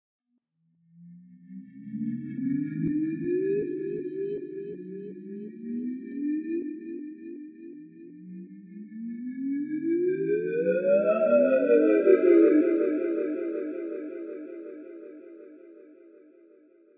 Angry Planet
Very retro Italian sci-fi vibe.
alien, electronic, loop, loops, sci-fi, sound-design, soundscape, synth